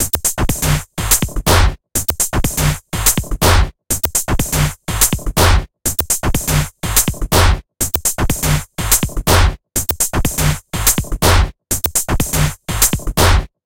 make music this beats
processed beat loop